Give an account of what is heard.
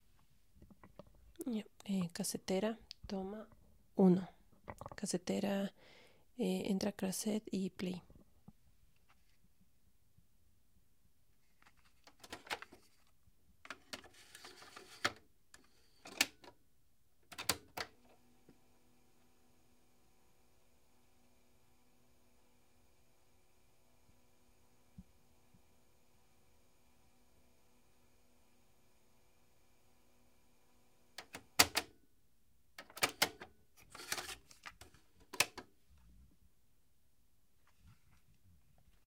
Cassette player foley, buttons and playback. Recorded with DR40 and RODE NTG1 from a 2000s era AIWA portable stereo.